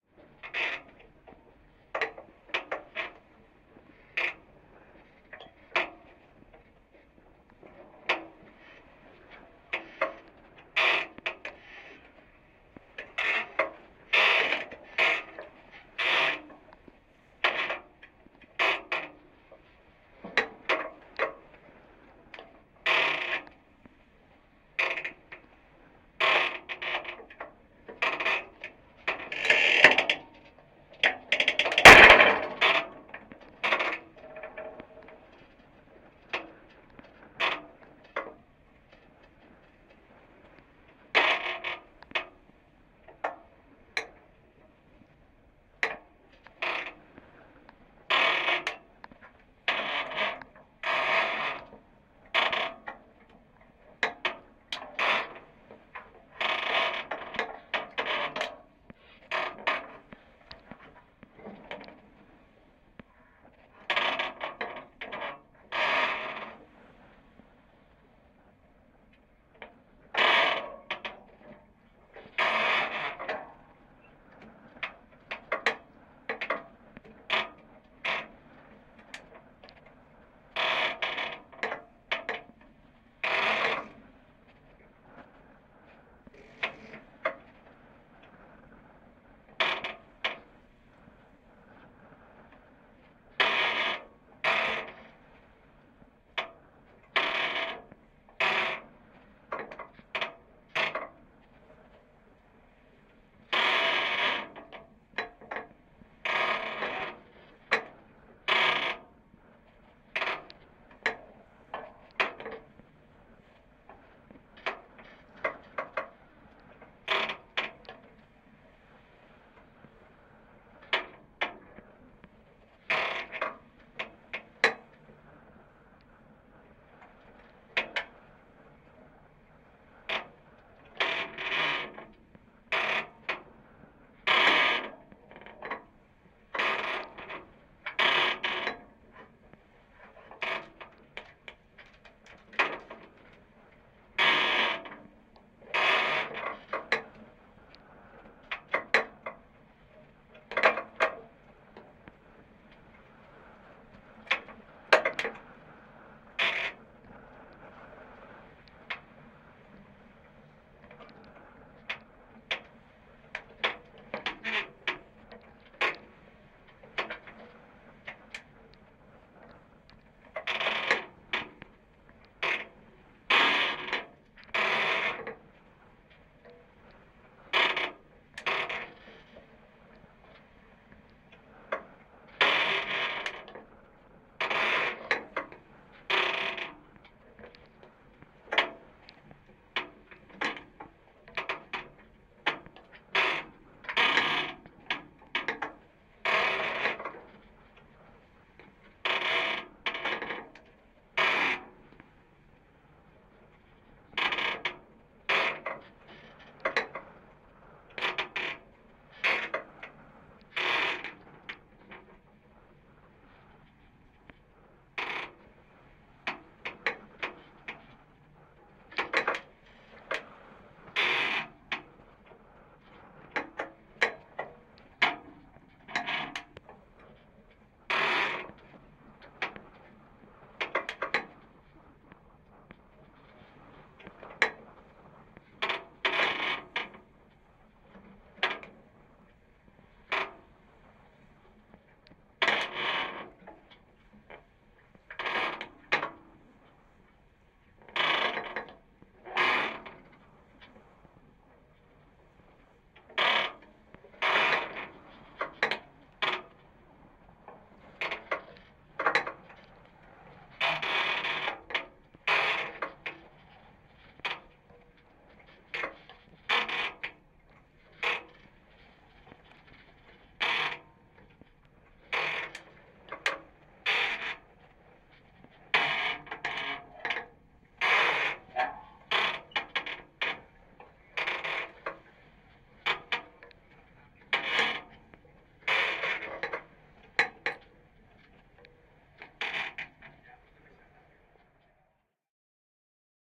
Sail boat Boom squeaking (contact mic)
Boom of a sail boat squeaking during navigation, recorded with a contact microphone.
Mono, contact mic (JrF)
boat, boom, contact-mic, field-recording, navigation, sail-boat, sailing, sea, squeaking